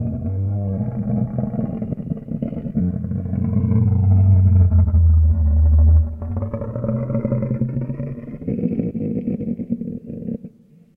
A monster or dragon cry
scream, dragon, etc